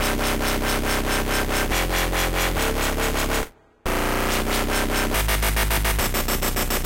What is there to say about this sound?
dubstep wobble bass 140BPM #2
Some nasty wobble basses I've made myself. So thanks and enjoy!
bass, bitcrush, dark, dirty, dnb, drum, drumnbass, drumstep, dub, dubby, dubstep, filth, filthy, grime, grimey, gritty, loop, wobble